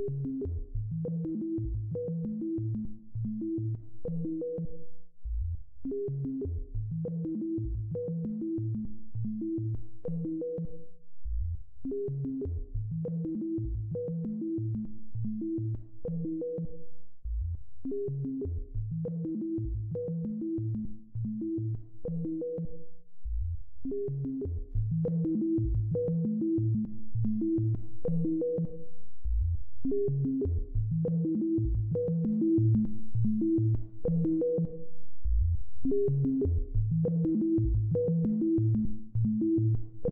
estudos e divertimentos diversos ao pd.